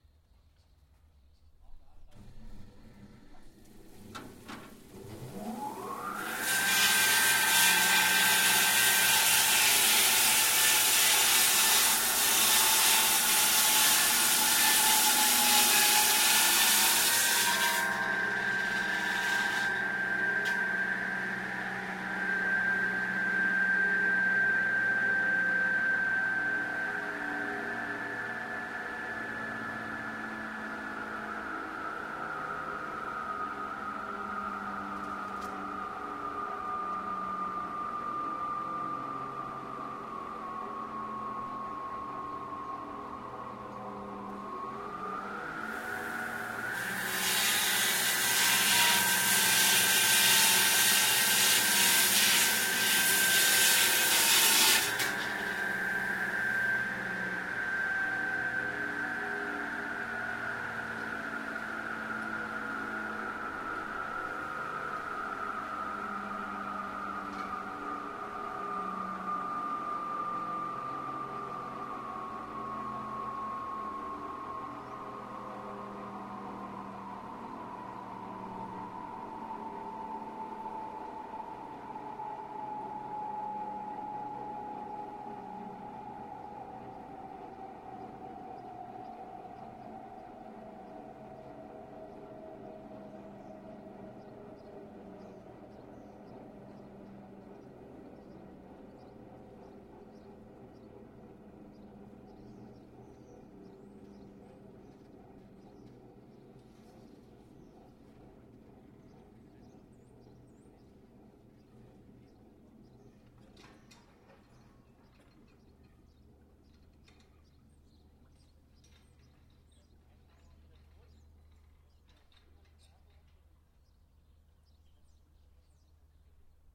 circular saw at a construction site
* circular saw for cutting stone on a construction site
* ambient noise: birds, voices, construction site
* distance to source: ~8m
* height above source: ~5m
* Post processing: none
* microphone: Samson C01
* cables: 2x 6m Sommer SC-THE Stage 22, Hicon Connectors
circular-saw, construction, construction-machine, construction-site, cutting, saw